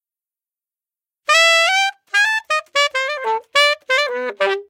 Another simple lick in Am @ 105 bpm